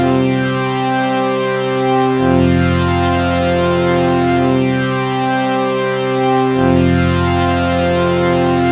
A member of the Alpha loopset, consisting of a set of complementary synth loops. It is:
* In the key of C major, following the chord progression C-F-C-F.